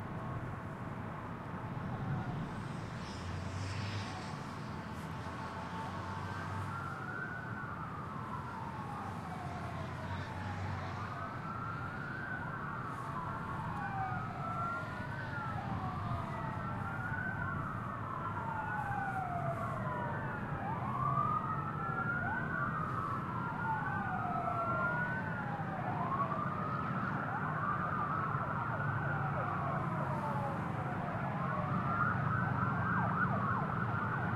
Noon atmo on the National Mall in Washington D.C. next to the Washington Monument. The recorder is on the outer ring path around the monument, facing north towards the Ellipse and the White House. Lots of sirens from diverse fire-engines, EMS vehicles and police cars can be heard in the far range speeding down Constitution Ave. What sounds (and can well be used... ;-) ) like a scene from a catastrophe movie is, in fact, simply the safety detail for Marine One, the President's helicopter, returning to their various bases in the city after the President has safely disembarked. The helicopter itself, as well as it's famous twin, can be heard near the end of the recording.
Recorded in March 2012 with a Zoom H2, mics set to 90° dispersion.
loud, horn, sirens, city, siren, wide-range, traffic, field-recording, spring, atmo, emergency, afternoon, EMS, athmo, urban, fire-truck, USA, Washington-DC